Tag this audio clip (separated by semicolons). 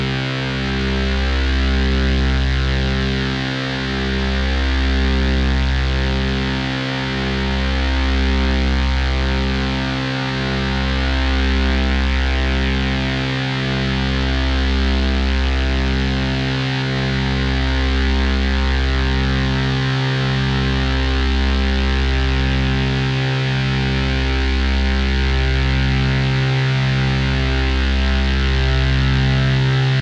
effect; synth; noise; analogue